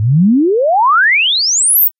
logarithmic sinusoid sweep with some amplitude variation.